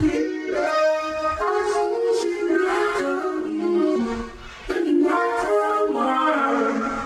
this is a little project i did to help children understand the dynamic nature of wood. sometimes you think wood should be knocked but then you regret it when she knocks your wood